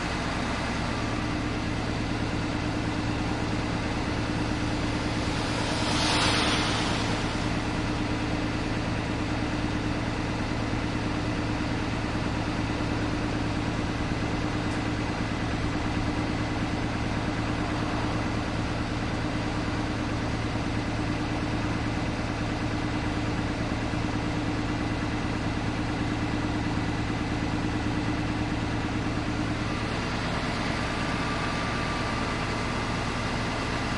Close recording of a new bus engine idling (2012 El Dorado Easy Rider II); some traffic noise in background.
engine, motor, diesel, idle, field-recording, bus